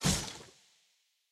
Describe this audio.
Hit Swing Sword Small 3
Small Sword hit/swing effect